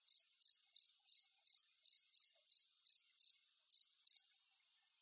Recording of a single cricket very close, for good, clean signal-to-noise ratio. The cricket chirps 12 times in this sample. Recorded with small diaphragm condenser mics outdoors at night to a Sytek pre and a Gadget Labs Wav824 interface.